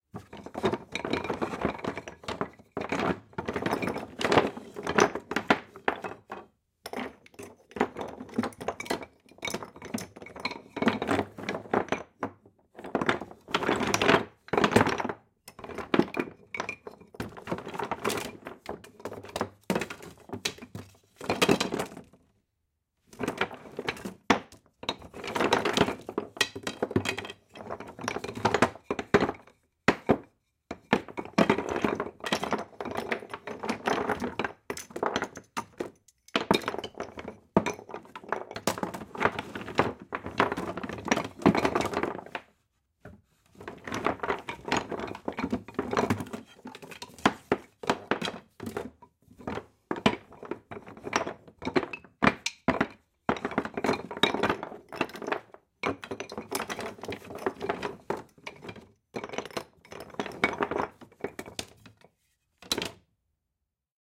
Rummaging Through Wooden Toys v1
An attempt to fill a request for the sound of rummaging through a wooden chest (trunk) filled with wooden toys...
Gear: Zoom H6, XYH-6 X/Y capsule (120 degree stereo image), Rycote Windjammer, mounted on a tripod, late 1800s wooden trunk, various wooden toys/items.
ADPP, antique, chest, foley, objects, tools, toys, trunk, wood, wooden